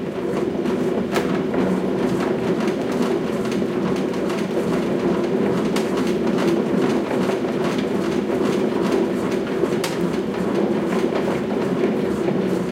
rumble produced pedaling on a static bike, as fast as I can
spinning, home-gym